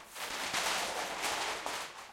Queneau Papier kraft 04
Papier, Kraft, froissement
froissement, Kraft, Papier